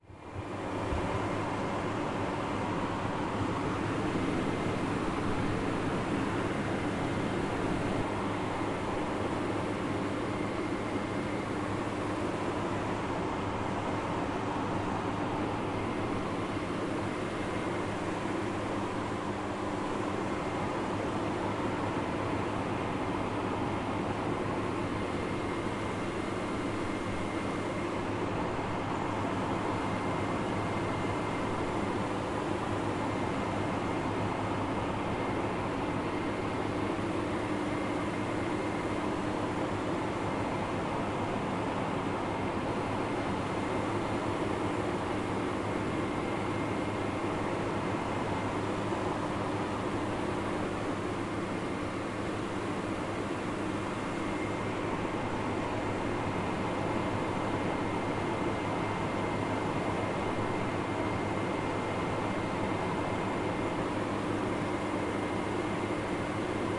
hi-fi szczepin 01092013 warehouse fan on robotnicza street 001
01.09.2013: fieldrecording made during Hi-fi Szczepin. performative sound workshop which I conducted for Contemporary Museum in Wroclaw (Poland). Noise of warehouse fan on Robotnicza street in Szczepin district in Wroclaw. Recording made by one of workshop participant.
zoom h4n